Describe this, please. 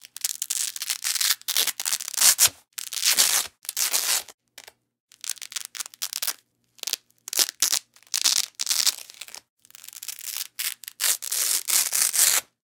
Some basic velcro sounds.
rip, rough, scratch, velcro